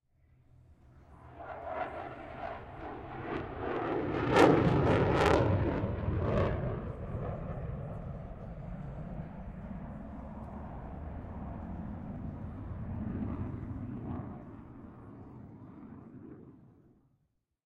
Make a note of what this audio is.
Eurofighter Typhoon Flyby 007 – Close Proximity
A recording of a flyby of a Eurofighter Typhoon – a modern jet engine fighter airplane – at an airshow in Berlin, Germany. Recorded at ILA 2022.